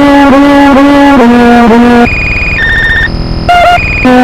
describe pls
This is a Casio SK-1 I did around a year ago or so From Reeds book plus a video out and 18 on board RCA jacks with another 25 PIN DPI that can run through a breakbox. Noise and Bent Sounds as Usual. Crashes ALOT. Oh and it's not the hardest "mother of bends" Serious, I wore socks and everything.
background, casio, glitch, if-your-crazy, lo-fi, noise, rca, scenedrop, sfx